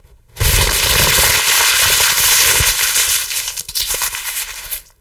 Crumpling Paper
Recorded by:
Microphone: MK105. Interface: Roland QUAD. Soft: Logic Pro X
angry, crumple, crumpling, fault, office, paper, sad, work